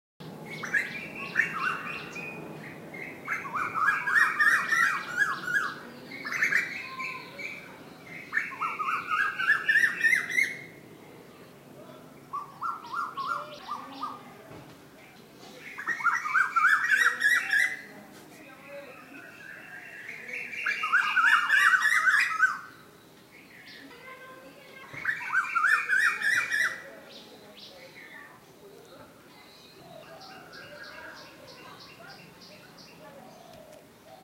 Cuckoo bird singing in winter
bird, kokil